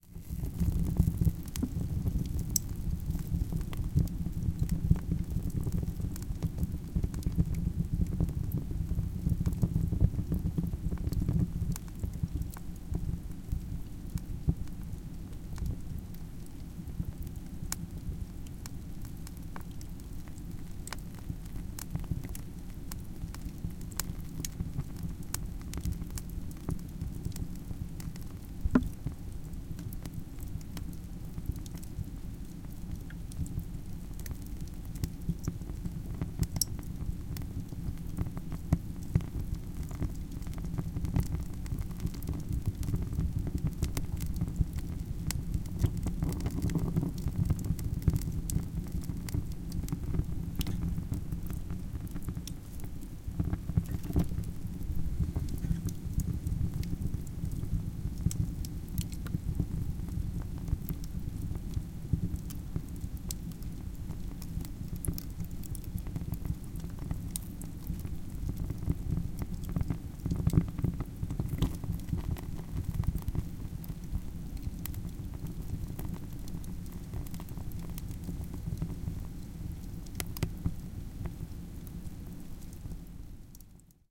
Close recording of a wood burning stove, medium activity.
burn, burner, burning, chimney, combustion, cracking, crackle, crackling, field-recording, fire, fireplace, flame, flames, heat, hiss, hot, logs, sizzle, smoke, snap, spark, sparks, spit, stove, wood